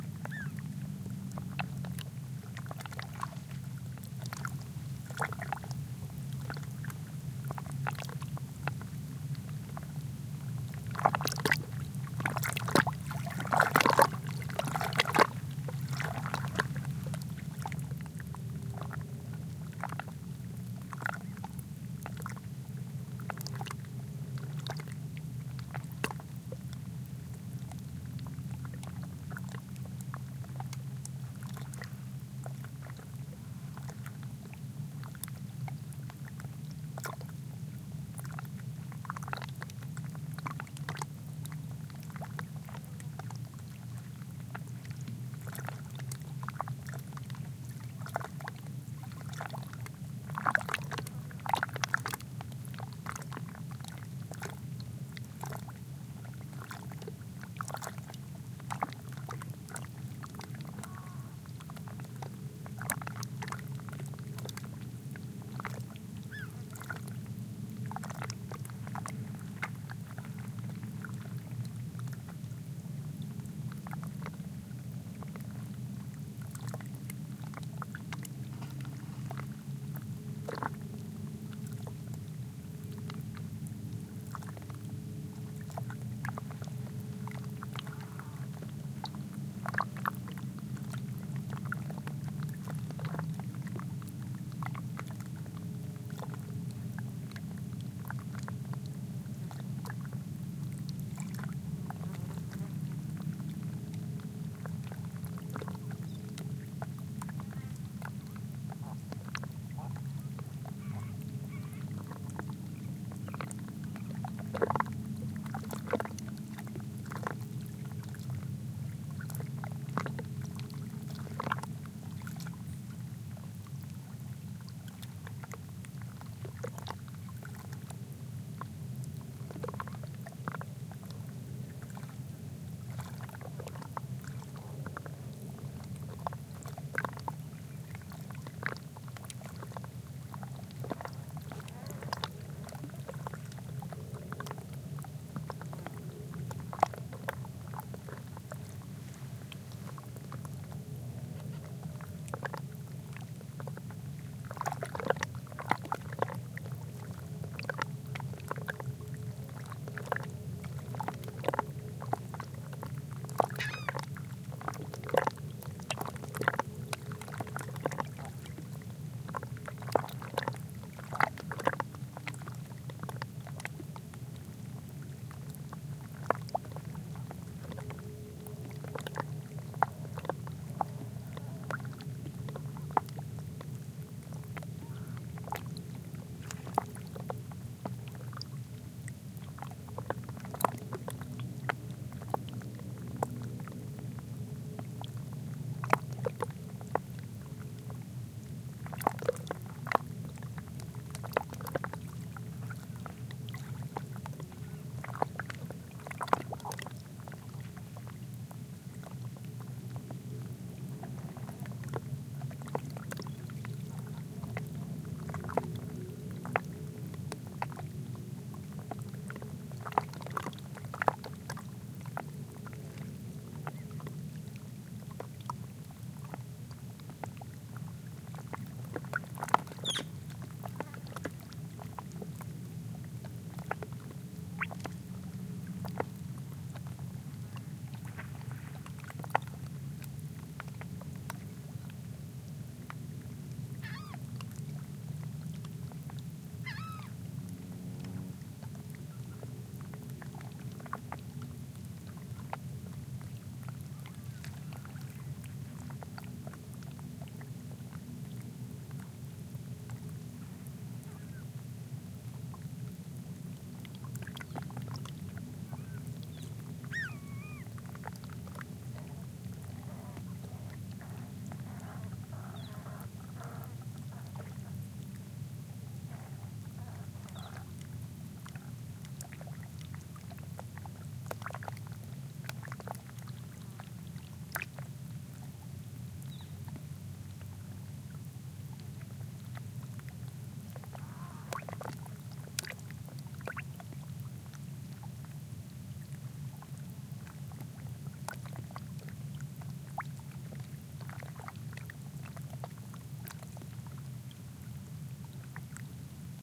waves splashing very softly, distant traffic noise and some bird calls. Recorded at Ensenada de la Paz, Baja California Sur, Mexico, with two Shure WL183, Fel preamp, and Olympus LS10 recorder